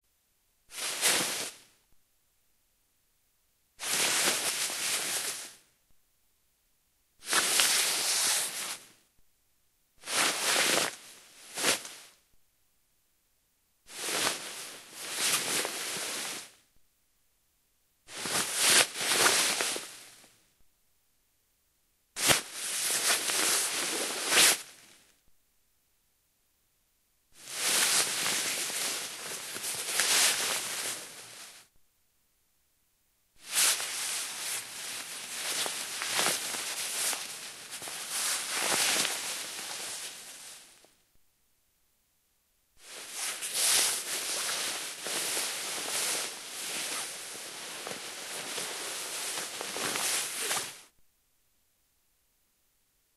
Clothing Rustle Nylon
rustle, Nylon, cloth, cotton, movement, clothes, foley, dressing, acrylic, clothing, jacket, handle